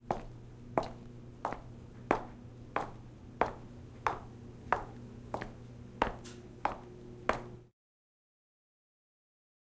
mp footsteps
Footsteps on hard surface.